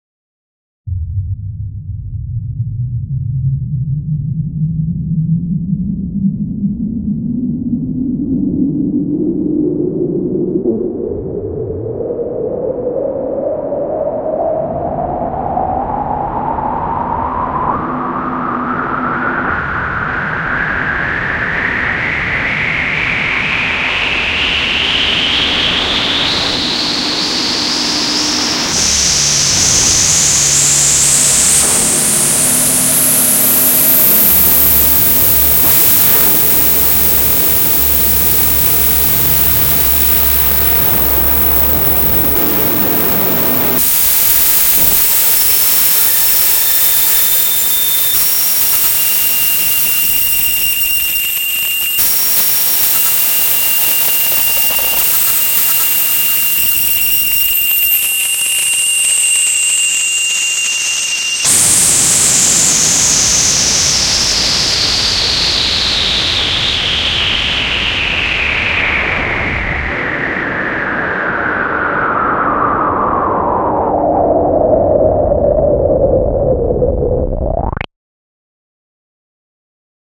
ELECTRONIC-NOISE-filtered-glitch-wall-of-sound01
While outputting a file of sound effects, I ended up with a severely corrupted file. Playback results include incessant shrieking and slight pitch alterations. To create more variety, I used a lowpass filter and long reverb.
block, brown-noise, digital, distortion, electro, electronic, experimental, filter, glitch, lo-fi, noise, overdrive, overdriven, pink-noise, processed, reverb, saturated, saturation, sweep, white-noise